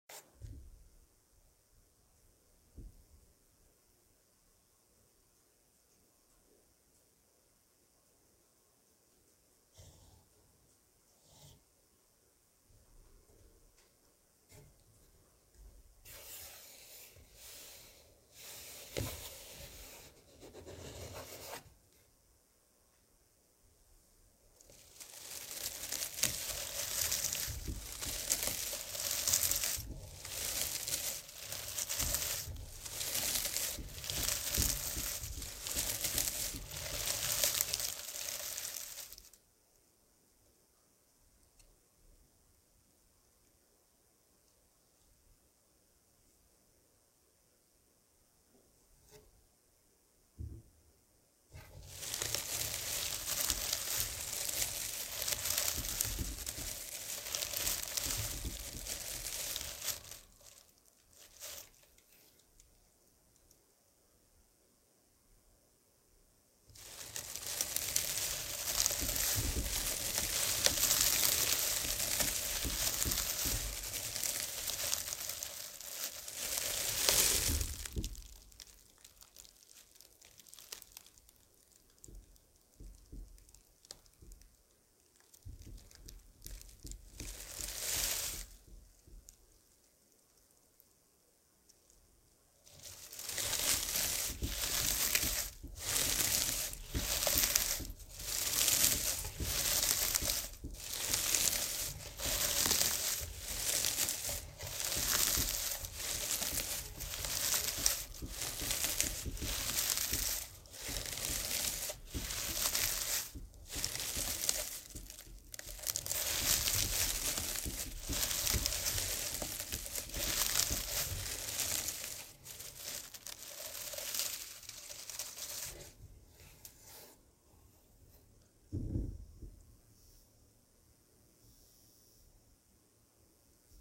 This is a recording feet walking through dry leaves in the fall.